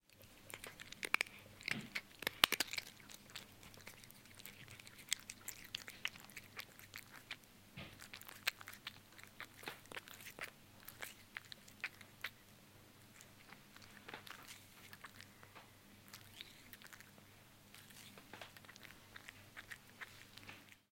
10 cat crunching
Cat is crunching. Wish I could know what that means.
eating, cat, crunch